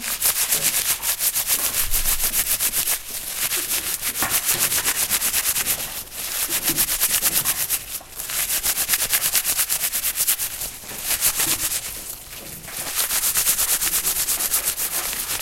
rubbing paper together with hands.